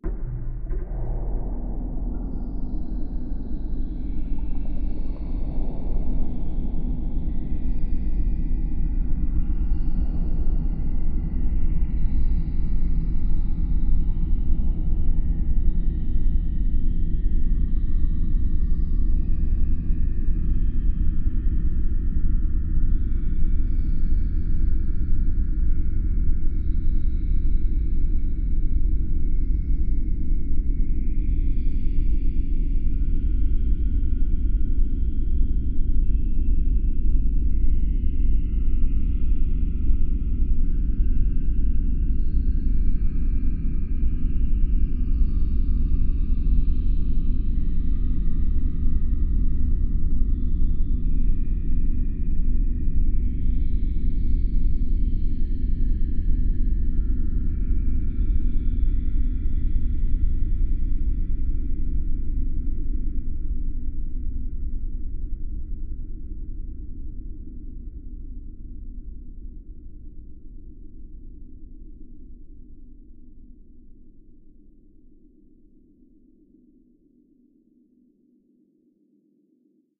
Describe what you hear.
LAYERS 005 - Heavy Water Space Ambience - E0
LAYERS 005 - Heavy Water Space Ambience is an extensive multisample package containing 97 samples covering C0 till C8. The key name is included in the sample name. The sound of Heavy Water Space Ambience is all in the name: an intergalactic watery space soundscape that can be played as a PAD sound in your favourite sampler. It was created using NI Kontakt 3 as well as some soft synths within Cubase and a lot of convolution (Voxengo's Pristine Space is my favourite) and other reverbs.
pad, soundscape, water, drone, multisample, space, artificial